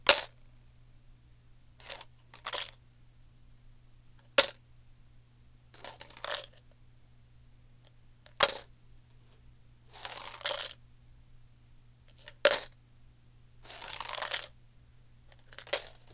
coins in a bottle.6
flipping a plastic bottle with coins up and down